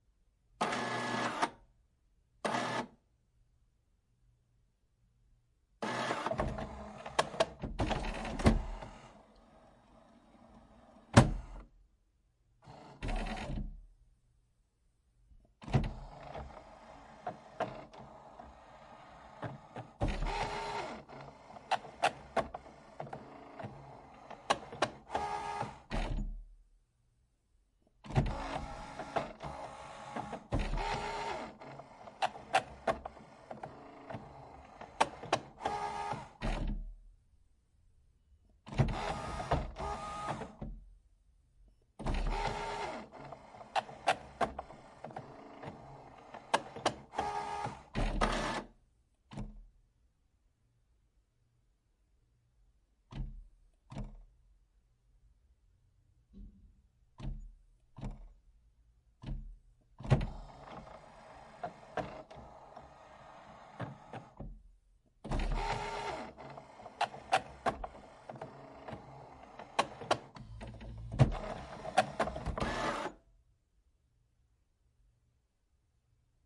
printer inkjet calibrating
inkjet, calibrating, printer